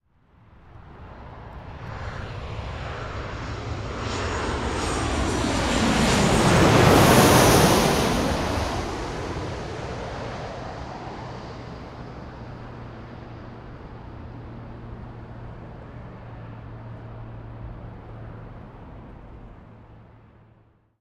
Jet Plane 7
A commercial jet passing overhead.
Aircraft, Airplane, Field-Recording, Flight, Flyby, Jet, Landing, LAX, Overhead, Plane, Transportation